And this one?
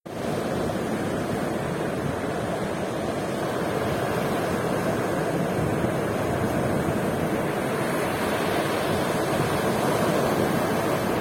sea beach noise light wind
I'm not a sound pro. If you do not want the wind noise on the microphone, it is stereo so you can remove the "windy" track if you do not want it.
Enjoy and go create something fabulous!
not a music pro. all tracks recorded with just a smartphone and uploaded raw. use for whatever you want. enjoy!
beach, sea, waves, wind